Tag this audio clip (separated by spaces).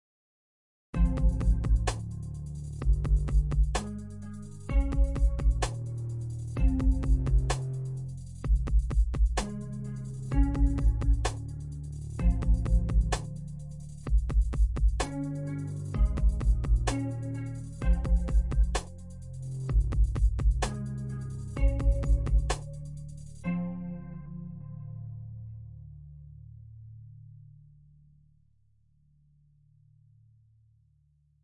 grey 128-bpm morning industrial electronic loop music sad rhythmic